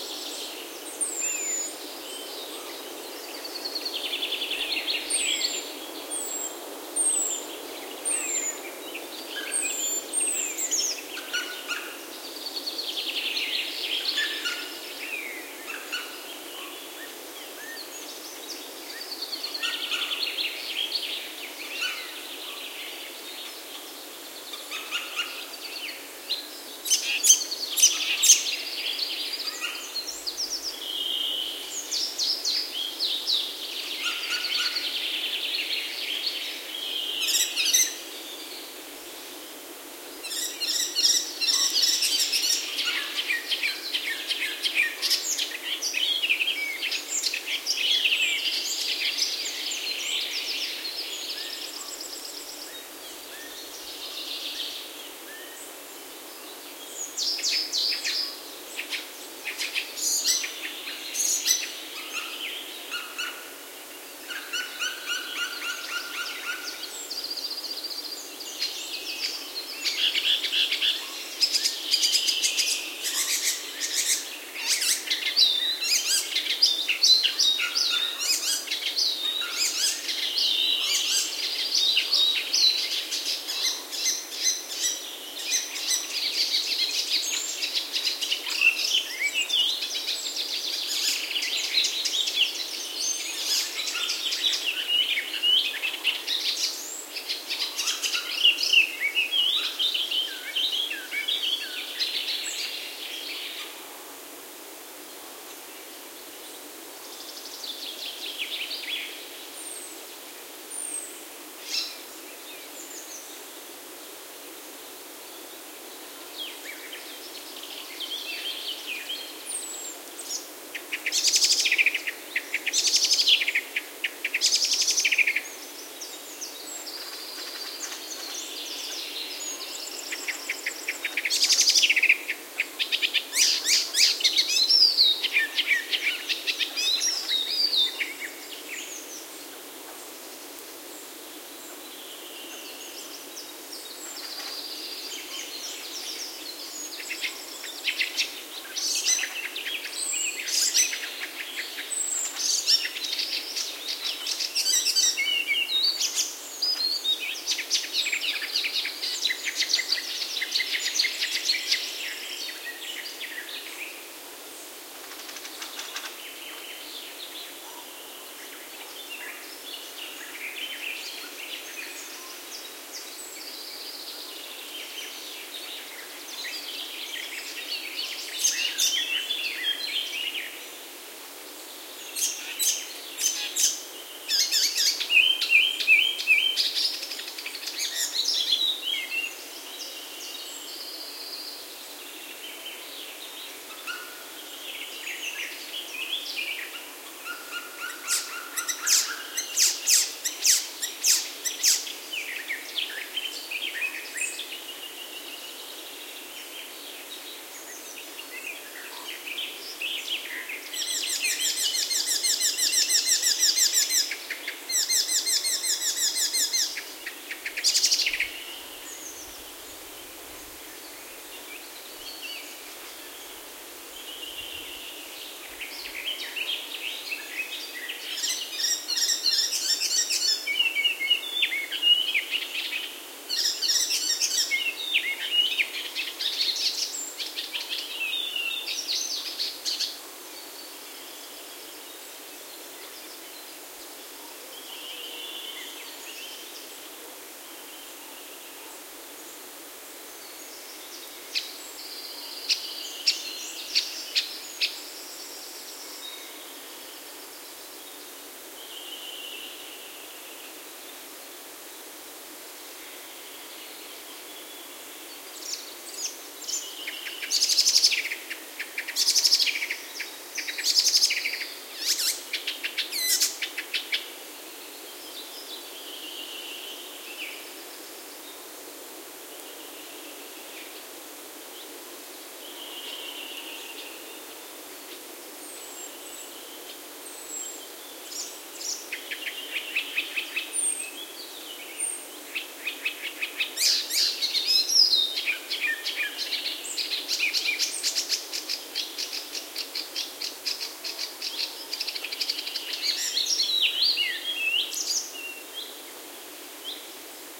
forest birds summer sweden
This is how summer in the middle of sweden sounds like a sunny day.
Recording made in a huge forest far from any civilisation.